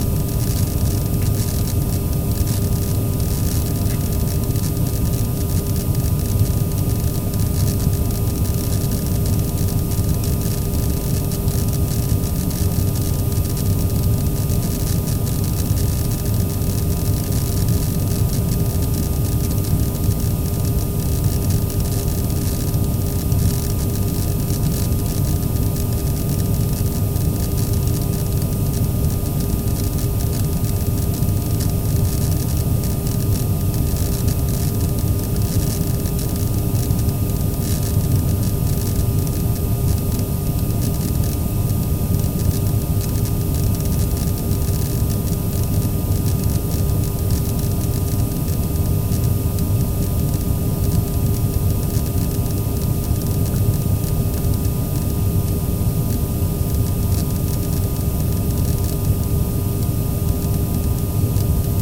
The hum of a domestic air cleaner. Recorded from the exhaust vent. The sound consists of the air stream, propeller and electrical arcing of the corona wire.